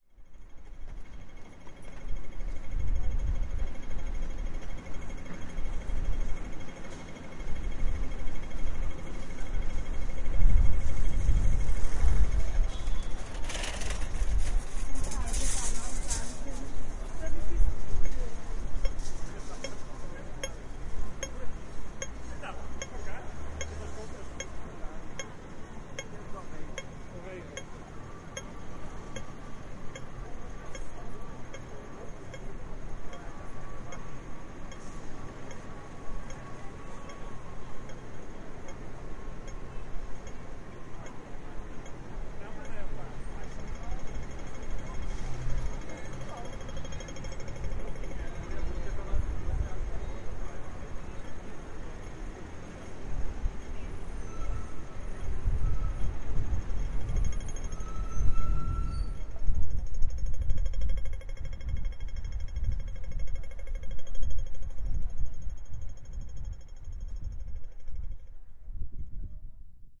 HongKong Stop-Lights
Particular sound of Stop Lights in the Streets of Hon Kong
City, field-recording, Hong, Kong, Lights, soundscape, Stop